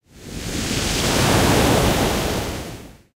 Wave hitting shore.
beach, coast, field-recording, great-yarmouth, holiday, nnsac, ocean, sea, seaside, seasideresort, shore, surf, water, wave, waves, yarmouth